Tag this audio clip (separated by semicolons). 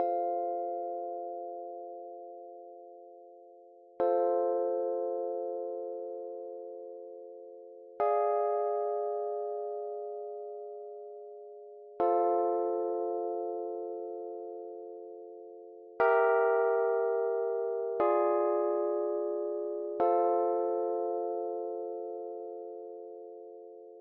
120; beat; blues; bpm; Chord; Fa; HearHear; loop; Rhodes; rythm